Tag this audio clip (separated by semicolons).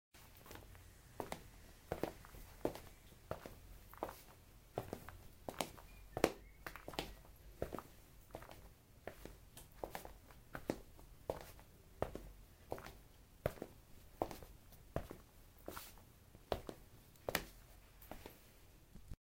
Indoors; Steps; Walking